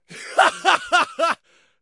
Male laughing out loud 1
Laughing out loud as if making fun of someone or laughing at someone.
Recorded with Zoom H4n